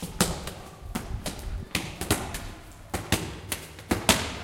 SonicSnap JPPT5 ShootingBall
Sounds recorded at Colégio João Paulo II school, Braga, Portugal.
ball, Joao-Paulo-II, Portugal, shooting, wall